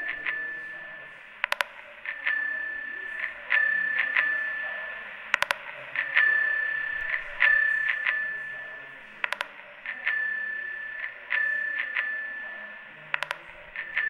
megaphone bell 002
Human Bike Sound Archive.
Sound of a bike bell filtered by a toy.
Glitches of a low-fi technology inside.